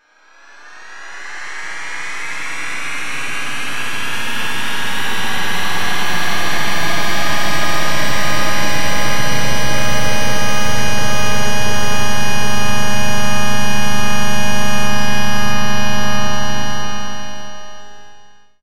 The 100% genuine and original HTX sound in stereo :P
3 merged samples featuring 21 group-panned notes with portamento and plenty dialed up effects like chorus, delay and reverb, everything except external panning and volume-ramps are coming straight out of the X-station.
Rumor has it that the core of the original THX-sound actually was programmed in Csound in case you want to recreate the original. A google search will give you the right pointer.
movie, cinematic, film